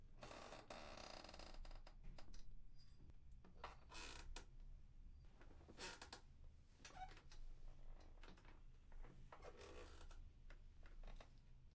Es el sonar de una puerta vieja cuando se abre